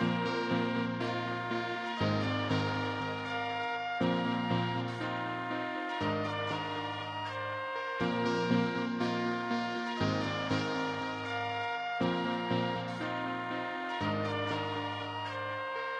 loop made with alicias keys , abakus soft synth and a wee flute in ableton